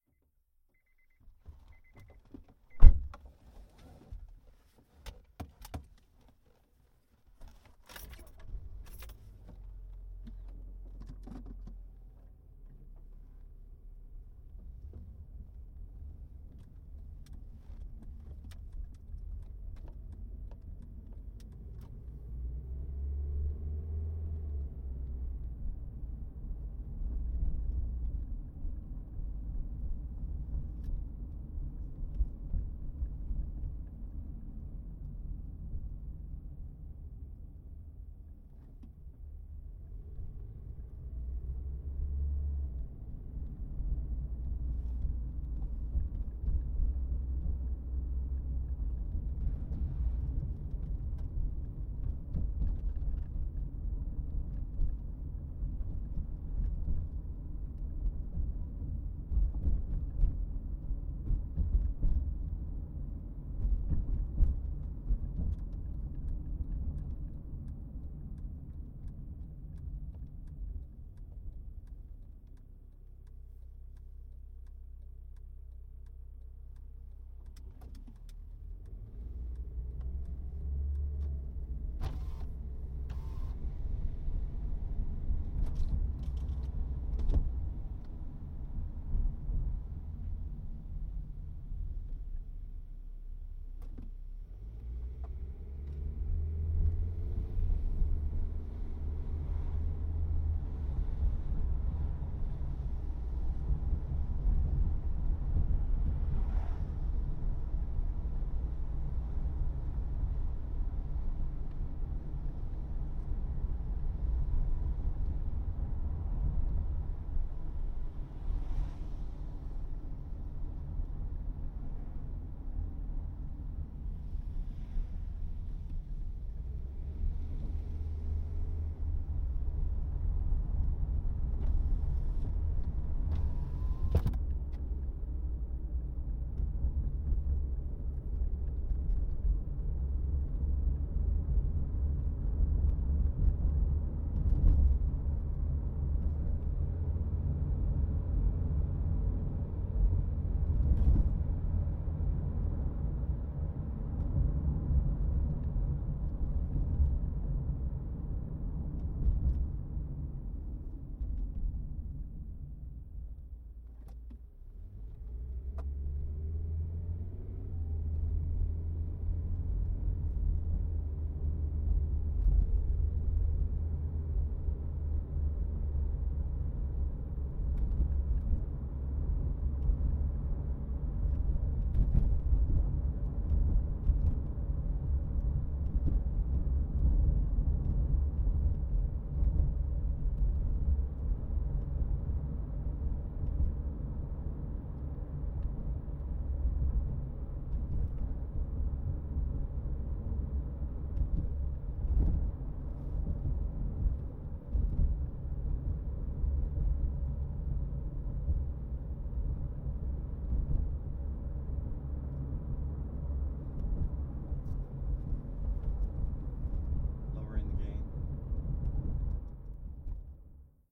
CAR GETIN and drive W
ambisonic WXYZ. mono W track of ambisonic. Car interior driving. Windows open and shut different surfaces. gravel. smooth road and bumpy. low to medium speeds. backup at end. POV backseat center.